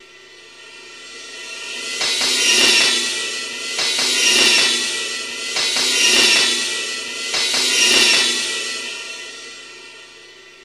Train sound, created on computer.
synth, techno, noise, train